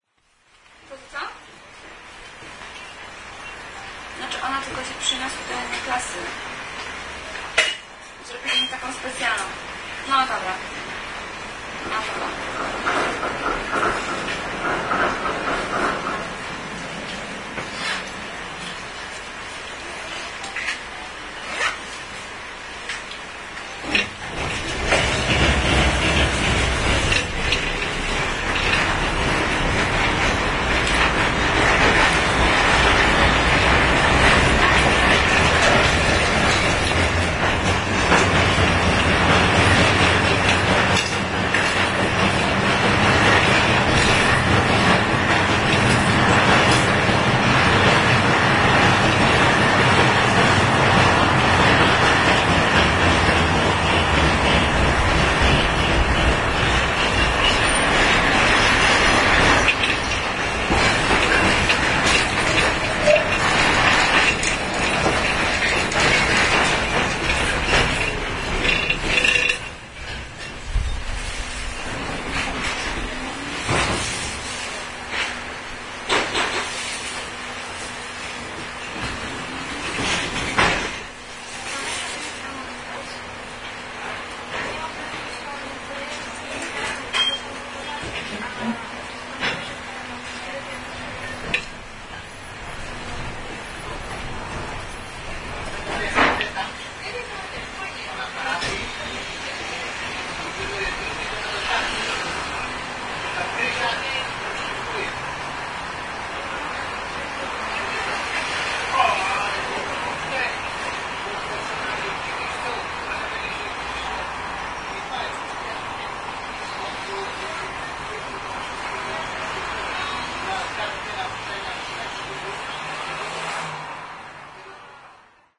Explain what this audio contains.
03.09.09: about 15.00. I am inside the tramway number 2. The track between Kupiec Poznański and Aleja Marcinkowskiego.
pozna, street, cars, tramway, noise